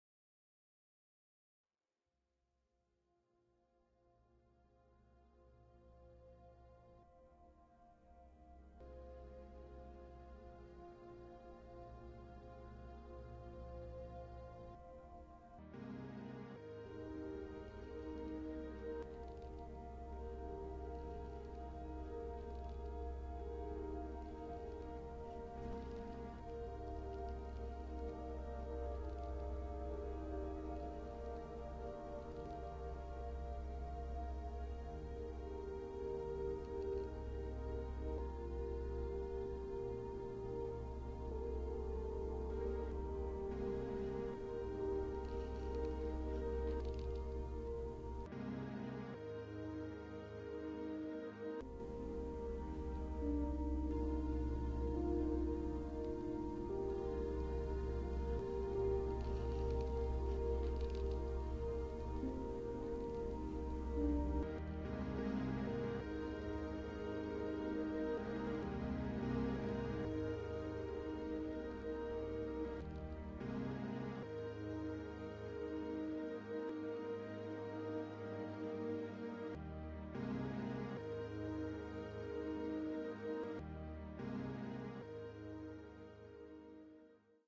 Urban snow
Ideal for staring out of the window at an urban landscape in the snow.
Ambient, Atmosphere, Atmospheric, Background, Cinematic, Film, Free, Landscape, Movie, outdoors, Rooftops, Sound-Design, Urban, Urban-landscape